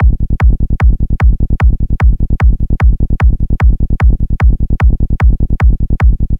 Psytrance Kick and Bass Loop